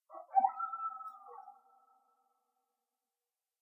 A coyote outside my house in Tucson, Arizona, with noise reduction and reverb applied. Recorded using my computer's internal microphone. There are a couple artifacts from the noise reduction in this one.
animals, arizona, coyote, desert, field-recording, howl, night